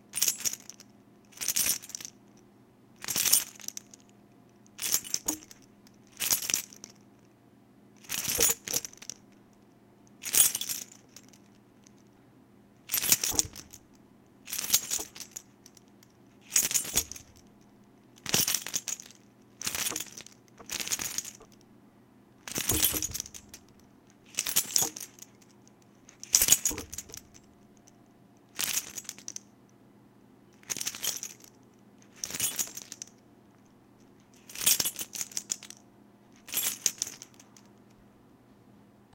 Key Rattle: Metal clanging. Recorded with the WinCret microphone forthe purpose of being used in games.